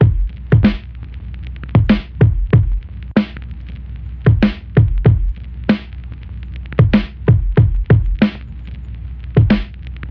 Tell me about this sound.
Lofi - Kick+Snare+Vinyl Static 95 BPM
chill, school, samples, bap, old, Lo-fi, drum, vinyl, lofi, sample, drums, hiphop, dusty, oldschool, Loop, music, loops, pack, 76, BPM, boom